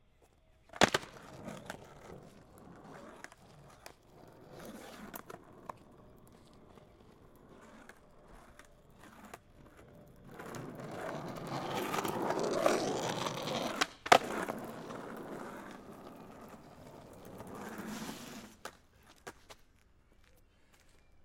Skate on road 1
Long board stake, hard wheels. Recorded with a Rode NT4 on a SoundDevices 702
long-board, asphalt, skate